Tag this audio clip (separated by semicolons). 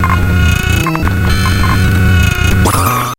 random; glitch; digital